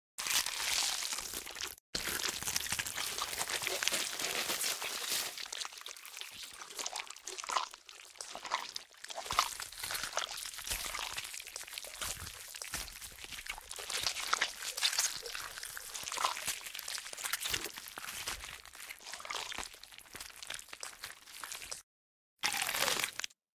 Zombie Bite 3
multiple zombie flesh bites and FX.
bite, blood, gore